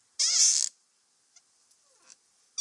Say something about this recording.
One of a selection of recordings of a squeaky door.
creaking, door, halloween, hinges, squeek